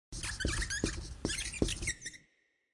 marker-whiteboard-squeak02
Writing on a whiteboard. Created by combining these sounds;
Cut up, EQ'd and compressed just for you!
animation, drawing, dryerase, marker, pen, pencil, scribble, squeak, whiteboard, write, writing